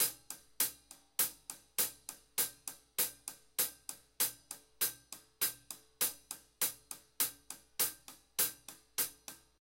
Hihat2 QaccMF
Part of "SemiloopDrumsamples" package, please dl the whole package.. With 'semilooped' I mean that only the ride and hihat are longer loops and the kick and snare is separate for better flexibility. I only made basic patterns tho as this package is mostly meant for creating custom playalong/click tracks.
No EQ's, I'll let the user do that.. again for flexibility
All samples are Stereo(48khz24bitFLAC), since the sound of the kick naturally leaks in the overheads and the overheads are a big part of the snare sound.
drums, acoustic